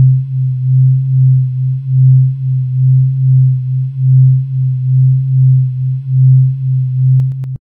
Detuned sine waves